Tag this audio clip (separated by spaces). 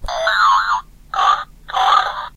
sound boing sample free toy oink